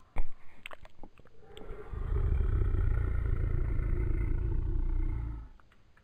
Beast squelch, than snarl.